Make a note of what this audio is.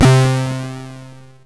A PWM lead with a noisy attack. Programmed using GoatTracker, rendered using SIDPLAY2.
thanks for listening to this sound, number 201647
attack, c-64, c64, chip, chiptune, demo, keygen, lead, pwm, sid, synth, vgm, video-game